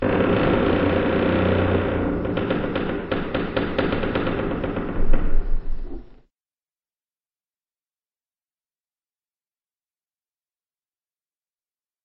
Door creaking 03 2
close, closing, clunk, creak, creaking, creaky, door, handle, hinge, hinges, lock, open, opening, rusty, shut, slam, slamming, squeak, squeaking, squeaky, wood, wooden